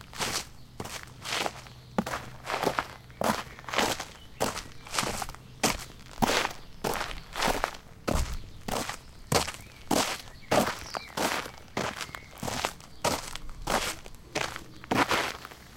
steps on sandy ground 360
Im walking arround my Zoom H2 (4Ch Surround) on a sandy surface.
sandy, surround, 360, walking